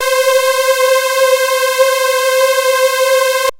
16unisono-saw
raw wave recorded directly into emu 1820m
andromeda, saw, unisono